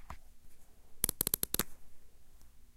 Take off cap of dry erase marker
Removing the squeaky cap of an Expo dry erase marker. Recorded using a Roland Edirol at the recording studio in CCRMA at Stanford University.
cap, marker, aip09, squeak